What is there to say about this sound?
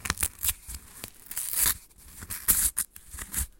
Queneau STE-151
crispy, sound, tiny